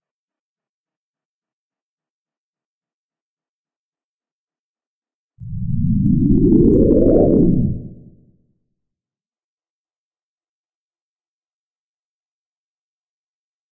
140 bpm ATTACK LOOP 1 ELEMENT 9 mastererd 16 bit
140bpm, beat, drumloop, techno